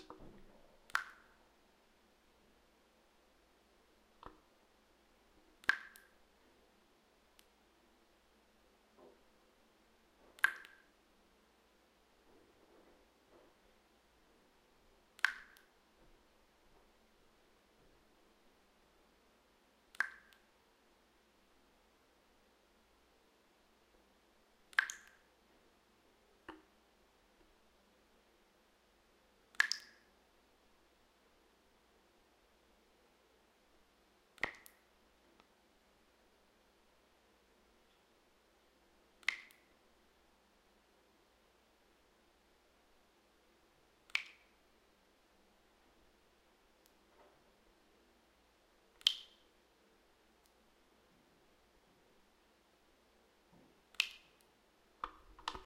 Faucet dripping water into a sink.